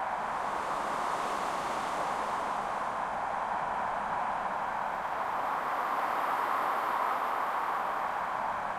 nice wind seamless loop
I have started working on 464108 "Soaring Clouds" by bruce965 who, I think, also deserves credit (note that 464108 was originally from 205966 by kangaroovindaloo).
I've created this by applying automation using "Slate Digital - Fresh Air" and "MIA - Thin". I've also added some reverb and some movement action in stereo space.
For "derivatives" (in which we've used other people's sounds) find the links to check the original author's license.
blow, breeze, zephyr, weather, gust